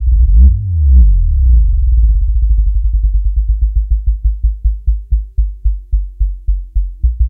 basscapes Enginamotorbass
a small collection of short basscapes, loopable bass-drones, sub oneshots, deep atmospheres.. suitable in audio/visual compositions in search of deepness
strange, creepy, suspence, horror, sub, pad, deep, experiment, illbient, dark, ambient, backgroung, drone, bass, soundscape, boom, rumble, atmosphere, spooky, soundtrack, soundesign, low, film, ambience, accelerate, electro, weird, soob, score